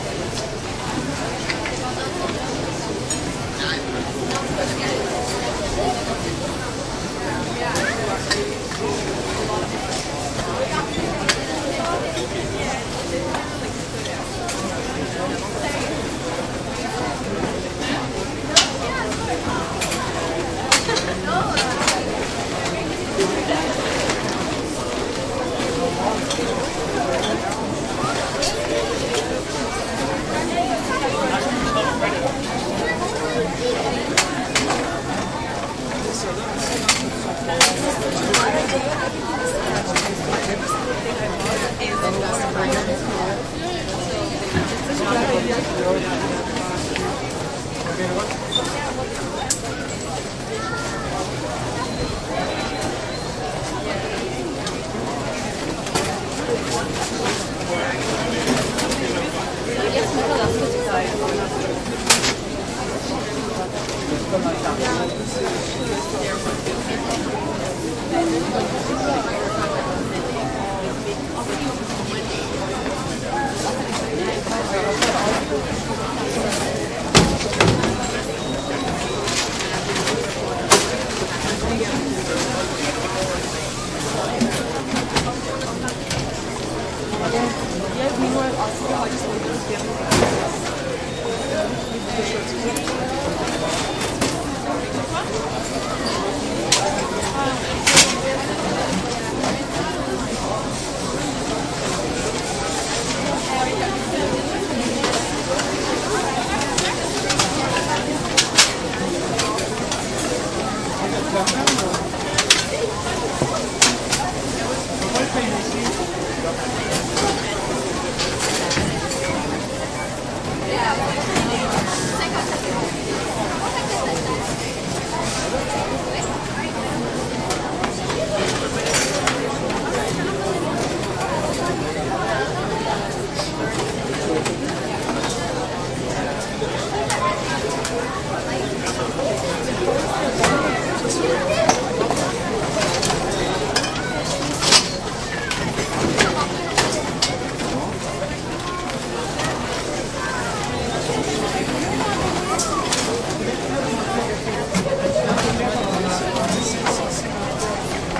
outlet department store checkout line cash area busy crowd merchandise being banged around IKEA Montreal, Canada
area, busy, Canada, cash, checkout, crowd, department, IKEA, line, Montreal, outlet, store